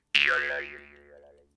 jaw harp16
Jaw harp sound
Recorded using an SM58, Tascam US-1641 and Logic Pro
harp, boing, bounce, silly, jaw, funny, doing, twang